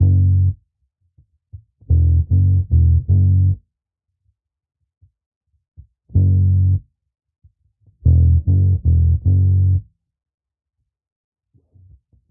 13 bass(3) dL
Modern Roots Reggae 13 078 Gbmin Samples